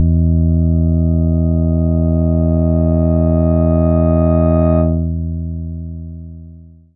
Analog synth bass
From a Minimoog
bass, synthetizer